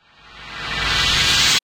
High quality whoosh sound. Ideal for film, TV, amateur production, video games and music.
Named from 00 - 32 (there are just too many to name)
swoosh, whoosh